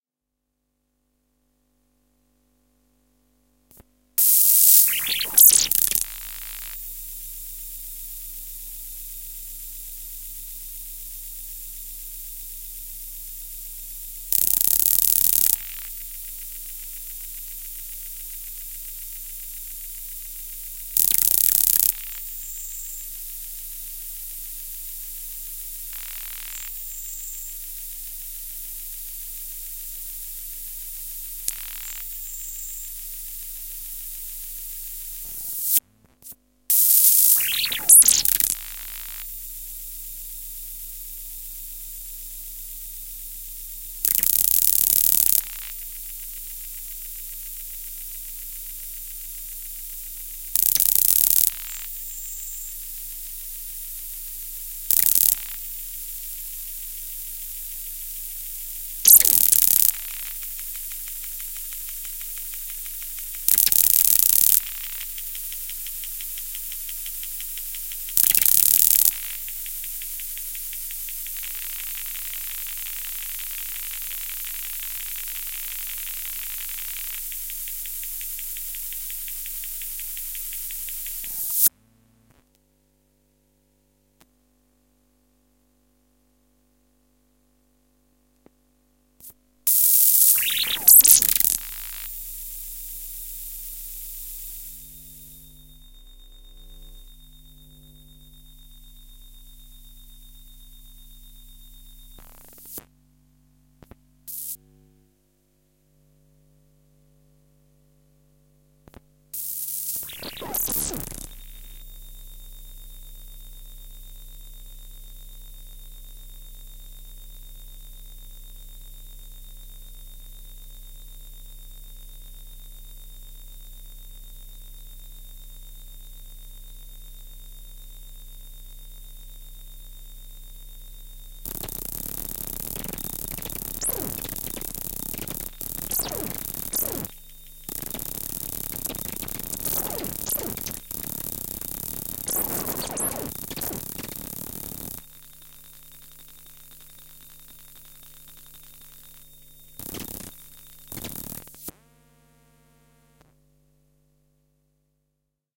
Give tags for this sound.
uhf
noise
glitch
Electromagnetic
inductor
sfx

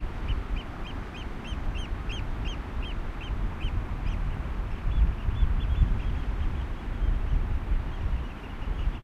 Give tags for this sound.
birdcall
birdsong
oystercatcher
waves
wetlands

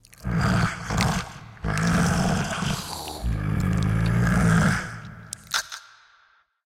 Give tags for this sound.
breathe
bull
roar

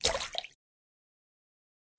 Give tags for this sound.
aqua
aquatic
bloop
blop
crash
Drip
Dripping
Game
Lake
marine
Movie
pour
pouring
River
Run
Running
Sea
Slap
Splash
Water
wave
Wet

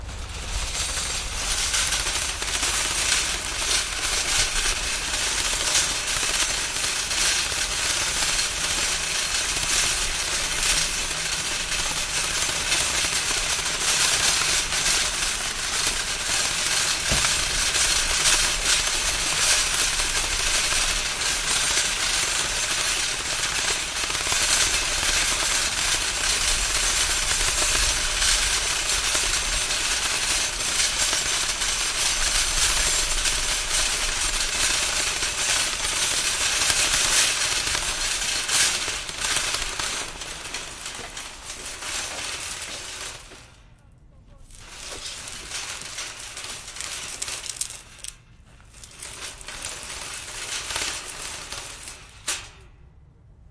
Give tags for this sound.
metal
cart
ext
push
rattle
shopping

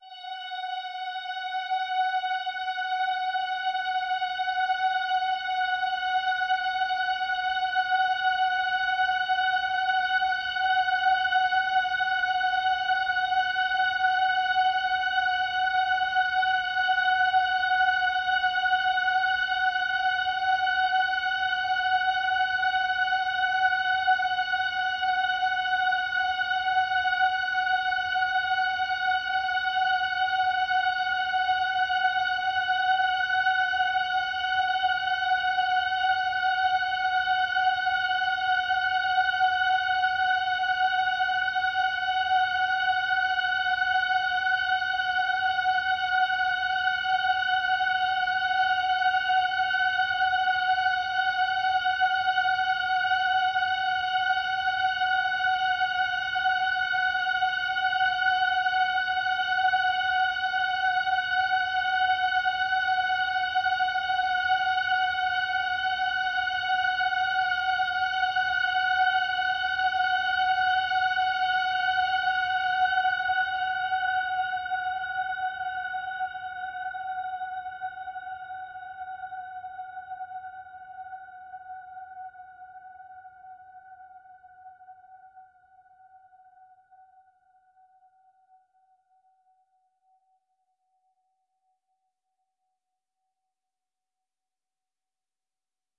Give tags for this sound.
ambient
drone
multisample
pad

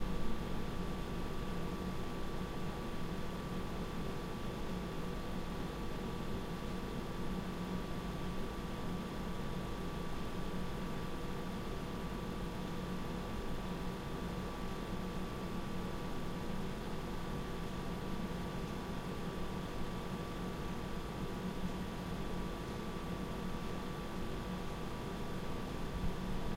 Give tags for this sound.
Coffee-machine
hum
stationary

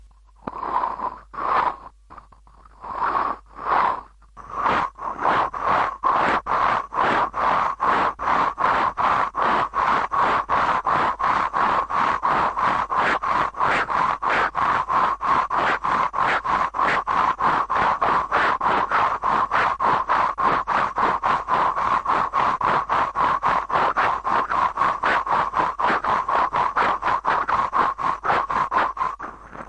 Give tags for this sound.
angst
breathe
hyper
medical
panic
trauma
ventilate

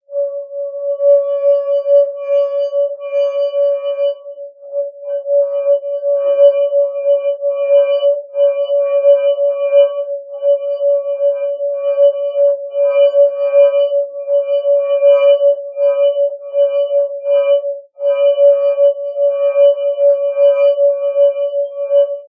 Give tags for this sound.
Audio
drone
dry
finger
glass
Line
lucid
Togu
wet

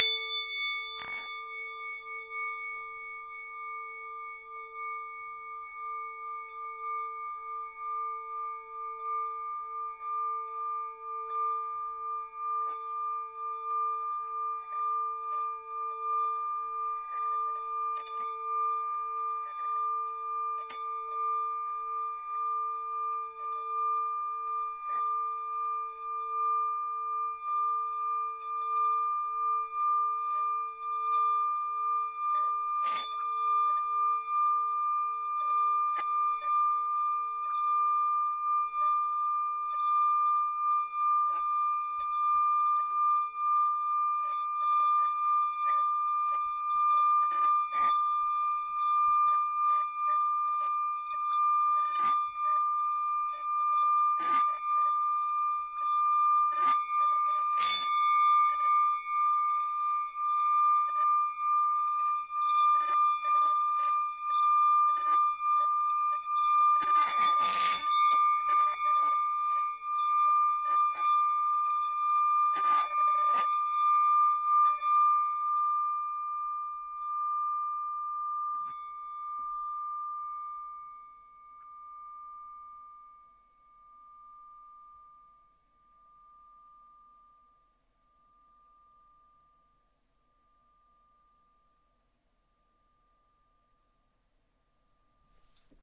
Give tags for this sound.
bowl
singing
tibetan

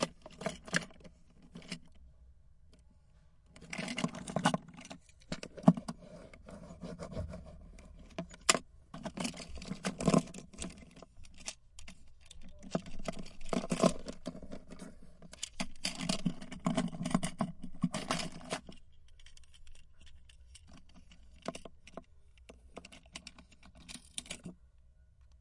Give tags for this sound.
bucket
many-of-the-same-things
race-cars
racecars
toys
toy-store
wheels-spinning

